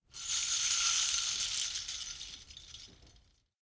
JinglingBells Wreath 04
a wreath of small metal bells jingling
jingle, jingling